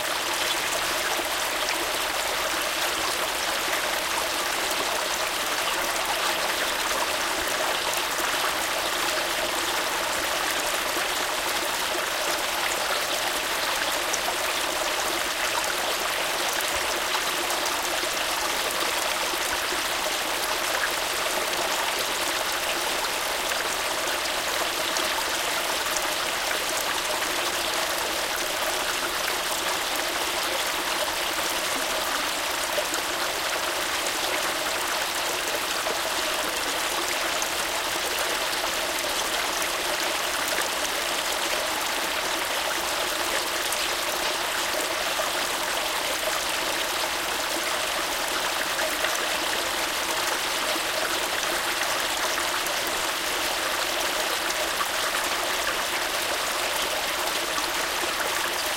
Stream hybrid
A hybrid of a binaural recording and a middle and side recording made with a Zoom H2.n(for the M+S) and a pair of Primo-EM172 mics (for the binaural).
Quite short in duration, but set to seamlessly loop.
Recorded in a rural location, North Yorkshire, UK.
hybrid, zoomh2n, stream, middle-and-side, primo-em172, binaural, north-yorkshire